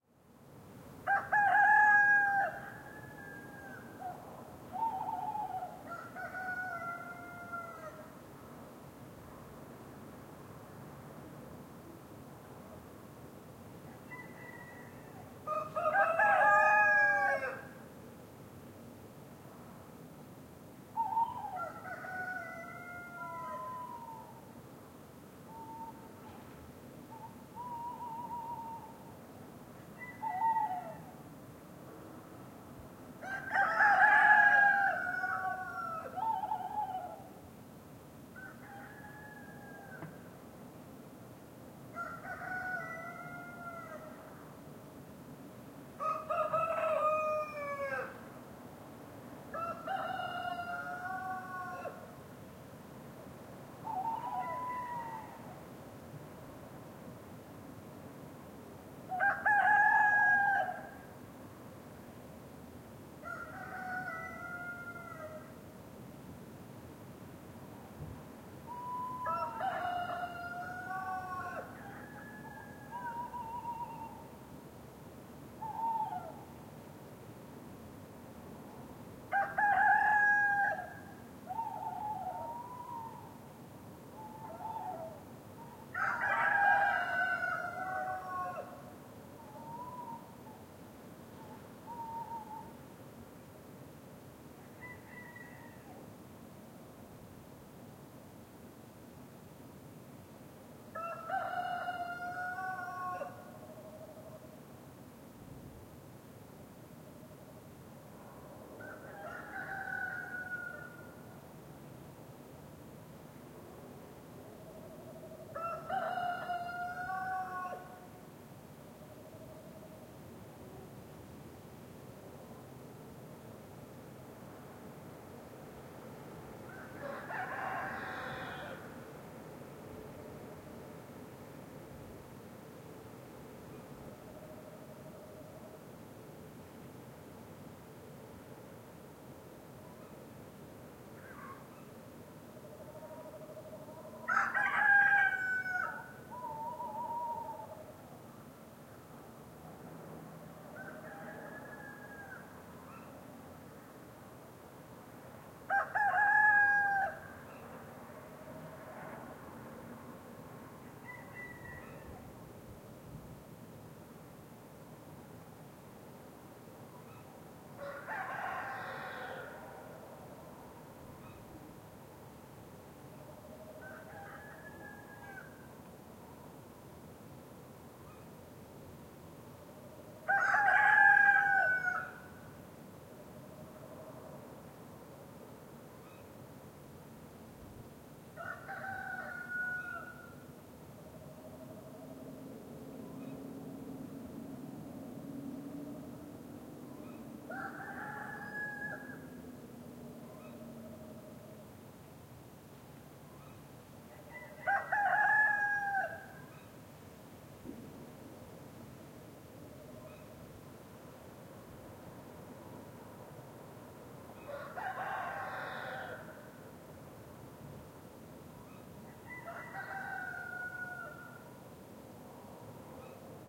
Farm at dawn, roosters and tawny owl
Farm at dawn in the countryside near Le Havre (France), some roosters at different distance, tawny owl and some circulation starting in the distance.
Sony PCM D100
Recorded the 8 of december 2017, 6am
dawn, morning, doodle, rooster, farm, a, doo, tawnyowl, cook